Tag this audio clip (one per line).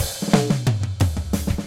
180bpm
drum
acoustic
loop
jazz
4
polyrhythm